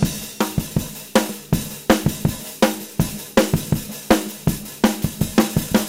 surf-main-loop
A loop of a surf-like rock beat, the main beat.
real, loop, acoustic, drums